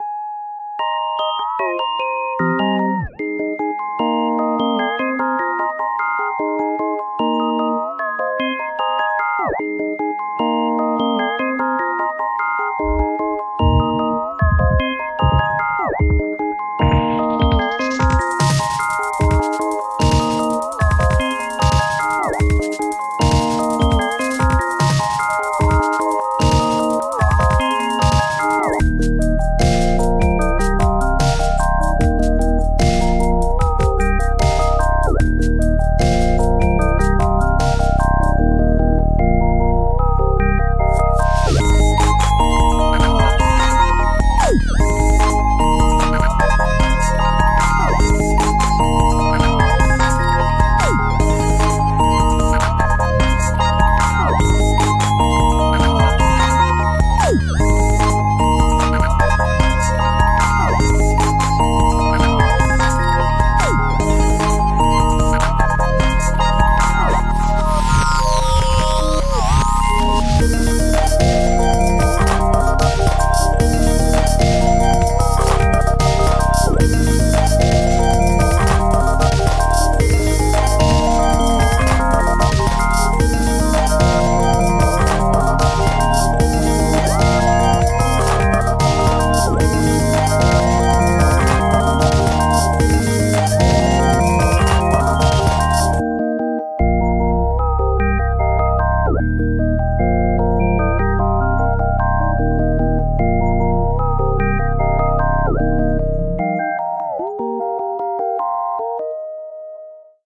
OHC 456 - Experimental
Experimental Synth Beat Glitch Synthesizer